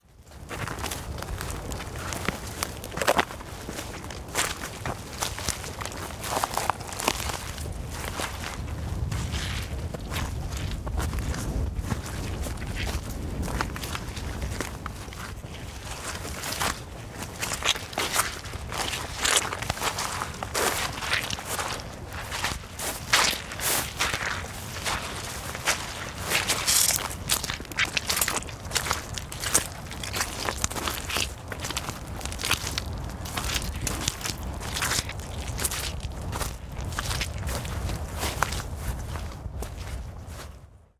Footsteps Walking Boot Mud and Long Grass
A selection of short walking boot sounds. Recorded with a Sennheiser MKH416 Shotgun microphone.
sfx, crunch, foley, footsteps, walkingboots, boots, mud, squelch, outdoors